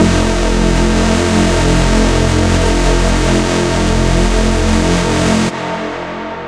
This sound belongs to a mini pack sounds could be used for rave or nuerofunk genres